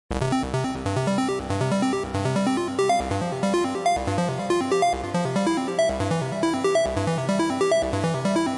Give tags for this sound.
140; bpm; club; dance; edm; electro; electronic; house; loop; music; rave; synth; techno; trance

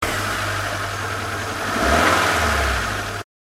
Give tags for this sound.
Carro; Video

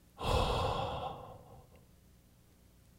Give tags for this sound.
breath; human

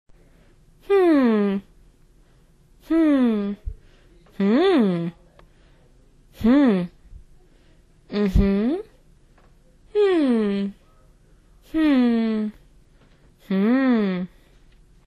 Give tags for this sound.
vocal; hmm; hm; female; voice; hmmm; woman

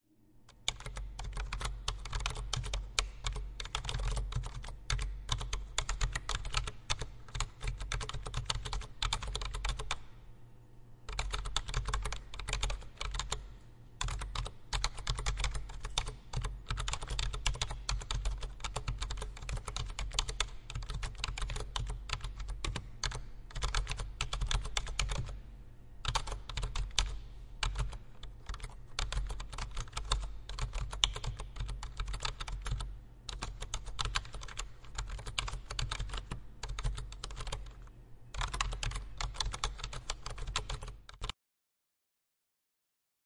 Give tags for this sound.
Panska; Czech; Pansk; CZ

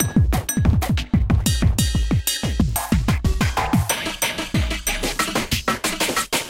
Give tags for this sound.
acid; breakbeat; drums; electro; hardcore; idm; rythms

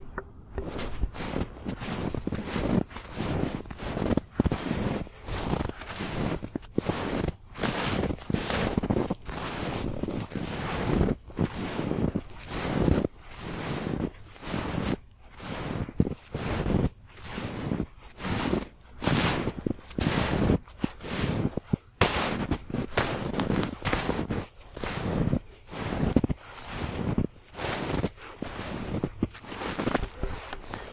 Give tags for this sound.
frost; snow; winter; step; leaves; footstep; foot; ice; walk; running

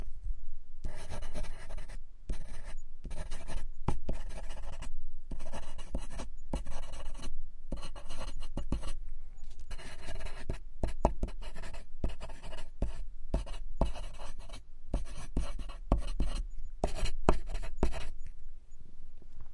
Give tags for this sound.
paper; pen; scribbling

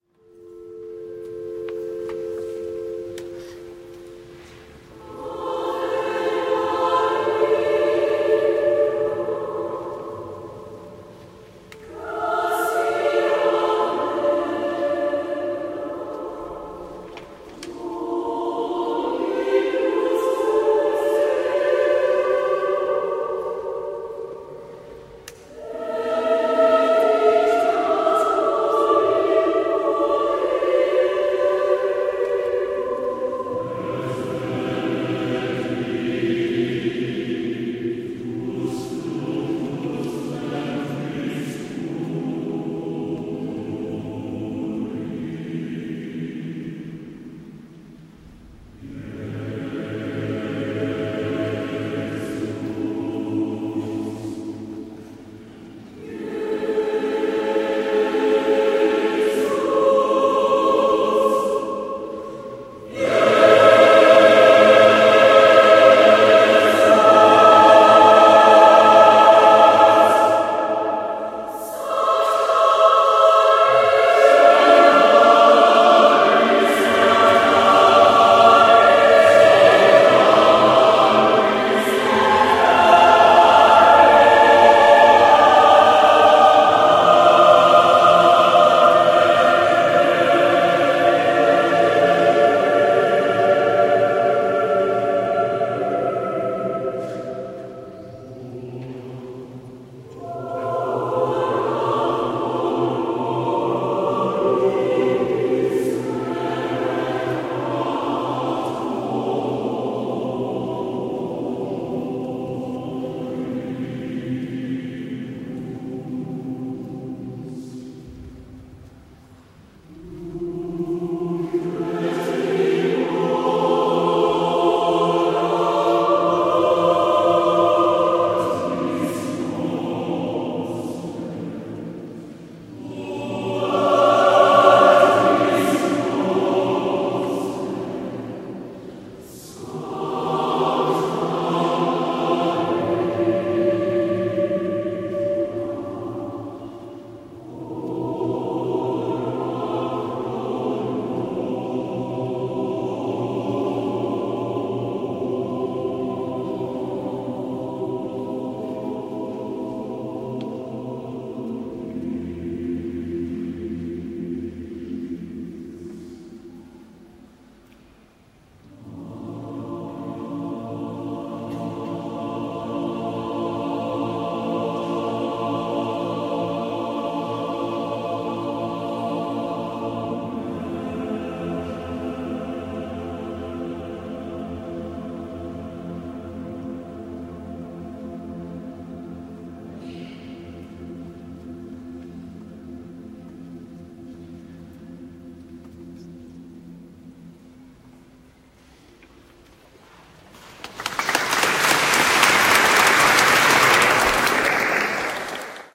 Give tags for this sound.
choir; reverb

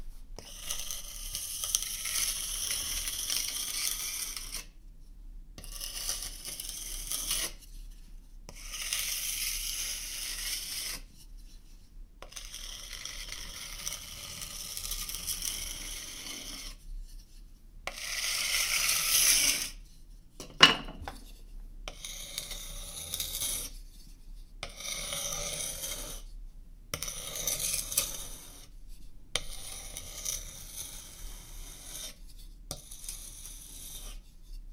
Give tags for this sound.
counter; countertop; drag; linoleum; scrape; spoon; wood; wooden

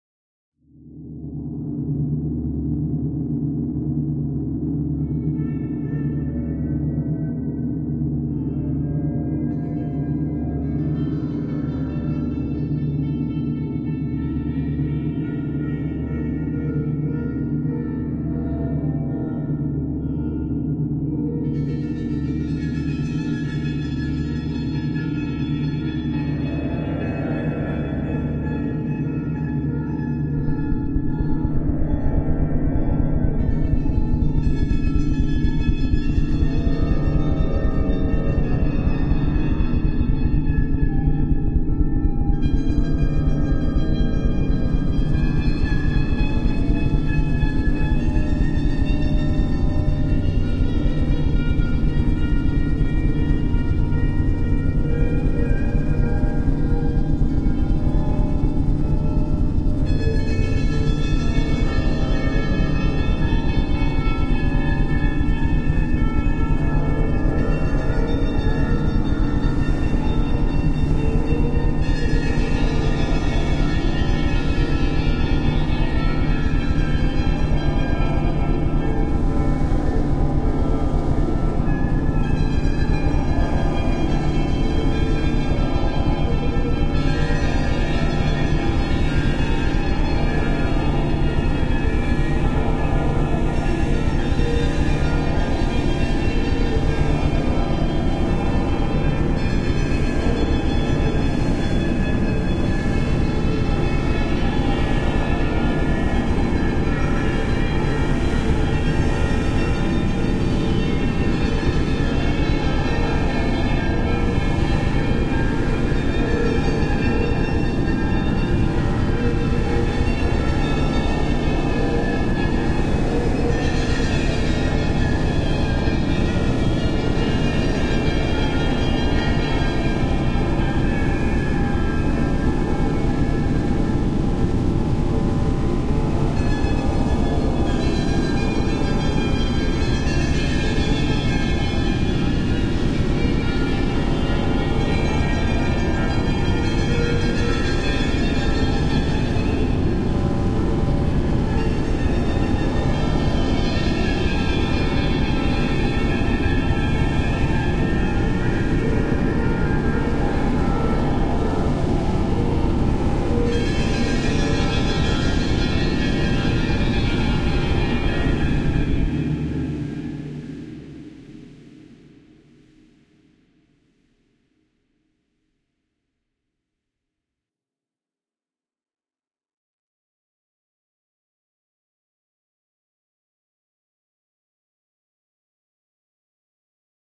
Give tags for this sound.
horror; soundscapes

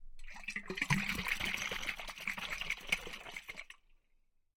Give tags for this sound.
bottle; drink; drinkbottle; liquid; shaken; slosh; sloshing; water

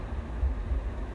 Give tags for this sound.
storm; wind; windy

